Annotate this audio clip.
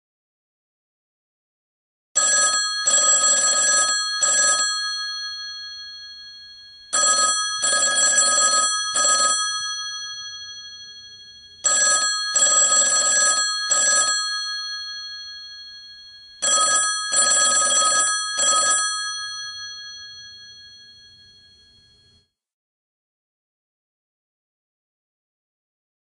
Legacy ringtone
alert, ringtone, ring-tone, cellphone, alerts, cell, cell-phone